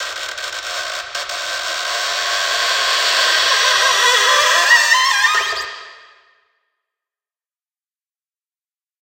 processed sample of a smaller wooden box opening and closing.
squeeling-door, vintage, wood